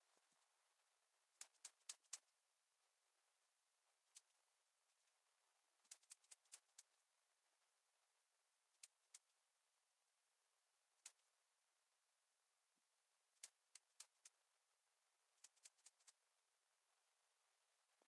a mono recording of a salt shaker
salt, shake, shaker